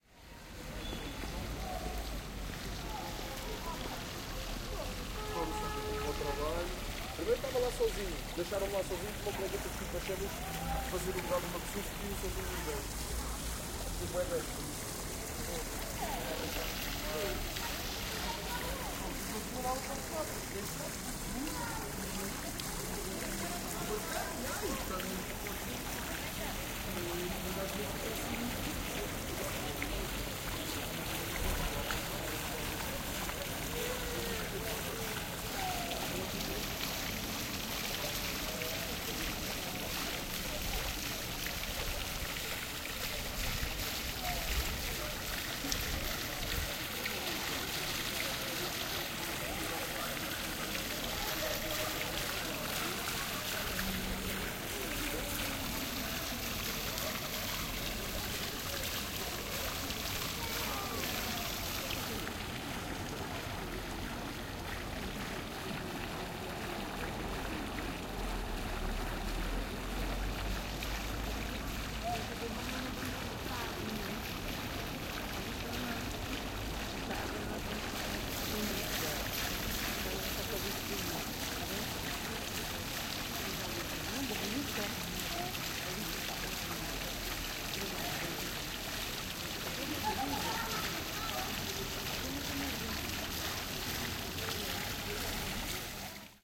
Water fountain at Jardim Augusto Gil, garden by Miradouro Sophia de Mello Breyner, Graça, Lisboa/Lisbon. People talking nearby